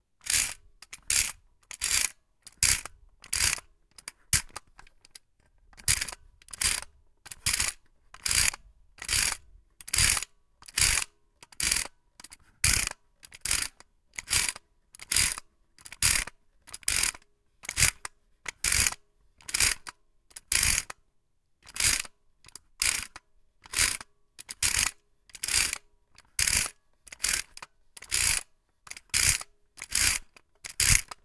Tin Toys: train manipulation pt.1
This is the recording of little train wind-up tin toy.
Myself while manipulating the broken tin toy.
Metal and spring noises.
spring, metal, metallic